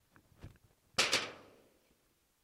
trueno trueno trueno
trueno, trueno2, trueno3